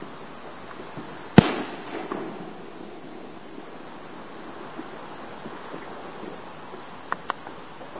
A big firework exploding outside my house on fireworks night (5th of November '08).
One of those huge booms that echo off the houses and you feel it in your chest, recorded on a digital camera so it doesn't sound nearly as good.